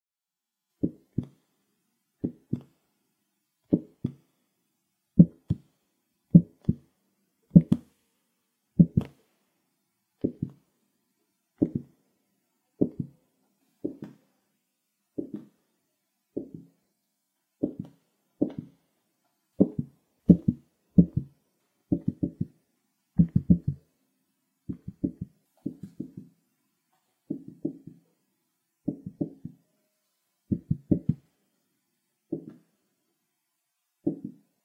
Wobble with plastic (similar with pasteboard)
board, wobble, agaxly, plastic, piece